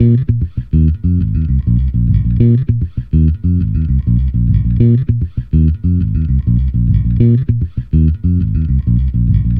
FunkBass GrooveLo0p G#m 12
Funk Bass Groove | Fender Jazz Bass